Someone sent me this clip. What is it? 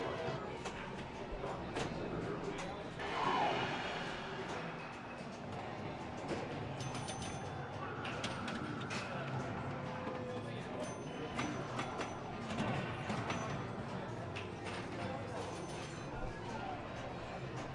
Sounds from the Pinball Hall Of Fame in LAs Vegas.